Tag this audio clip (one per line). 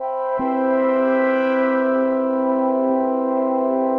atmosphere
ambience
music